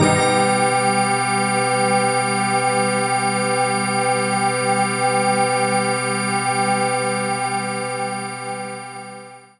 PPG Digital Organ Leadpad G#3
This sample is part of the "PPG
MULTISAMPLE 002 Digital Organ Leadpad" sample pack. It is an
experimental sound consiting of several layers, suitable for
experimental music. The first layer is at the start of the sound and is
a short harsh sound burst. This layer is followed by two other slowly
decaying panned layers, one low & the other higher in frequency. In
the sample pack there are 16 samples evenly spread across 5 octaves (C1
till C6). The note in the sample name (C, E or G#) does not indicate
the pitch of the sound but the key on my keyboard. The sound was
created on the PPG VSTi. After that normalising and fades where applied within Cubase SX.